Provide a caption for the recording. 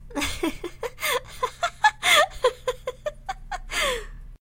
Girl laughing and gasping
some laughter i recorded of myself with the pitch and treble raised
girl, happy, laughing, laughter, woman, chuckle, giggle, laugh, giggling, female